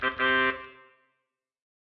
Ready to Start - Jingle
Short jingle in C-Major that could indicate the beginning of something
Jingle, Ready, Spawn, Start, Stinger